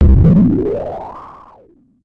A filter sweep over some interesting noise with high filter resonance. Made with Nord Modular.